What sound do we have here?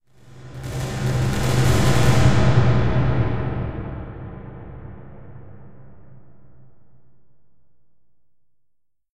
EXPLOSIVE TRANSITION
Cinematic Boom Sound with Audacity Granular Scatter Processor applied.
WHEN USING THIS SOUND I RECOMMEND THAT YOU WRITE DOWN THE ORIGIN SO YOU CAN PROVE IT IS LEGAL.
boom, tnt, epic, explosion, destruction, trailer, transition, cinematic